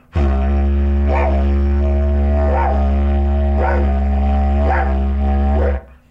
Didg Bark 2
Sounds from a Didgeridoo
indigenous, woodwind, aboriginal, didgeridoo